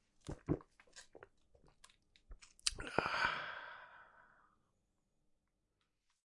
Swallowing a mouthful of fizzy drink with a single gulp and making a satisfied ahh. Actually a glass of beer. Recorded on an H5
aah, ah, beverage, coca-cola, coke, cola, drink, drinking, fizzy, gulp, liquid, sip, sipping, soda, swallow, swallowing, water